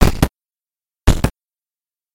~LOOP HITS! A fake little baby piggy bank shaking!